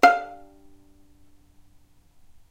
violin pizz vib F4
violin pizzicato vibrato
violin, vibrato